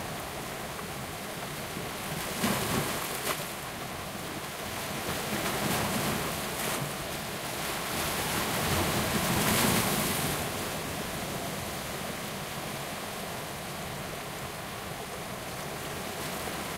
Taken with Zoom H2N, the beaches of Cyprus